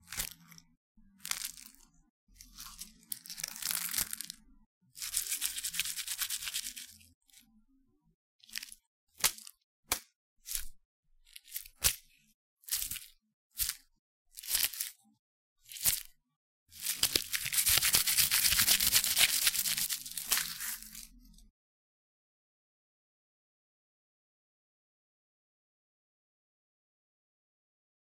Garlic cloves
Some cloves of garlic being tapped, rubbed together, shaken.
Microphone: Zoom H2
crumple, crunchy, food, garlic, kitchen, tap